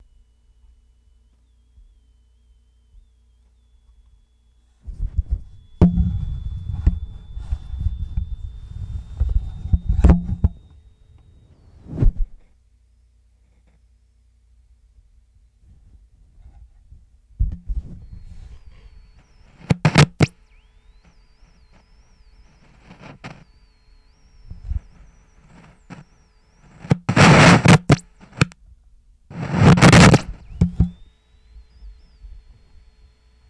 Yamaha DD 20 (HF)
Some random samples I managed to pull off of my Yamaha DD-20. You can trim and slice them as you would like.
yamaha, bent